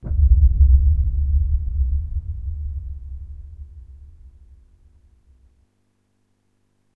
Ultra Subs were created by Rob Deatherage of the band STRIP for their music production. Processed for the ultimate sub experience, these samples sound best with a sub woofer and probably wont make alot of sound out of small computer speakers. Versatile enough for music, movies, soundscapes, games and Sound FX. Enjoy!